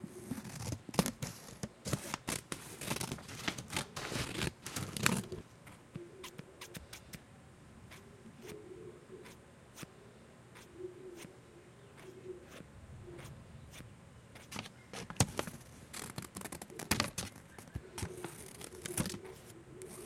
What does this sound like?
Scissor Cutting Paper
Scissors cutting paper
cut, cutting, Paper, ripping, scissor, scissors